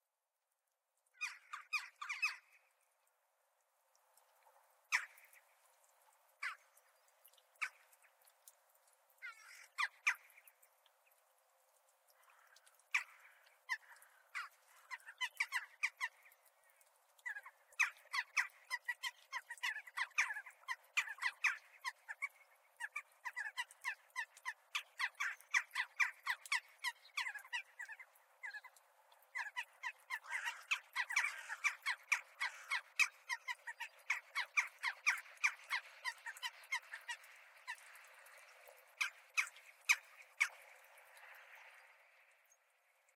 A murder of crows sitting in a tree chattering in an almost monkey-like manner. Some handling and noise but so low that some ambience should cover it.